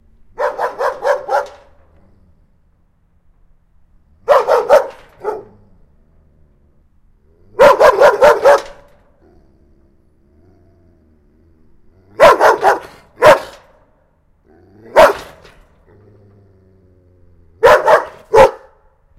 Agressive Dog Barks
Mad dog that growls and barks madly. he's agressive.
Animal, Loud, Large, Agressive, Mad, Growl, Barks, Madly, Bark, Big, Dog